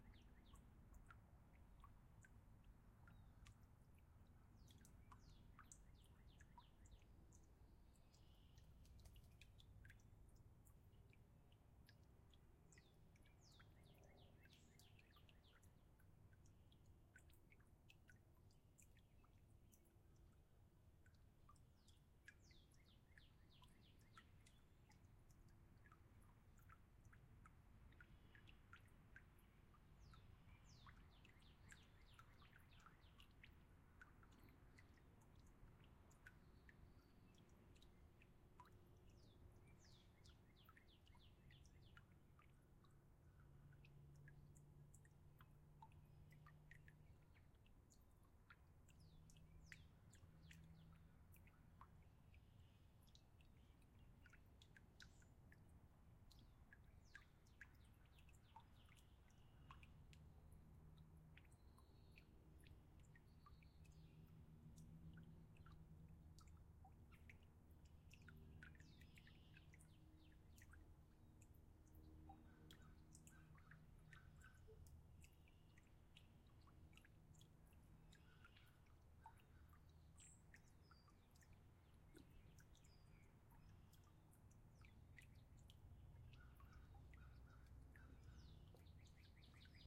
free use
tea garden recording H6 Zoom stereo